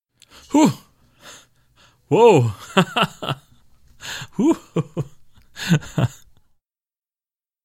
AS060822 Surprise
voice of user AS060822